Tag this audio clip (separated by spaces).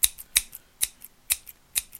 scissor cut snip